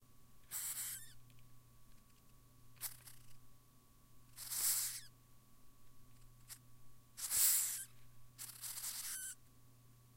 Madagascar Hissing Cockroach hissing
animal, cockraoch, hissing, bug, field-recording, insect